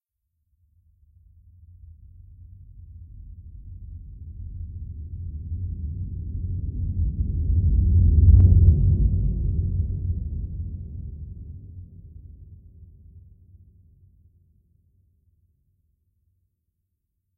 Large, Low Rumble
Sound of a large, deep rumble. Possibly an earthquake, or some other ominous phenomenon.
passing, noise, low, deep, ominous, reverse, rumble, rising, boom, earthquake, crescendo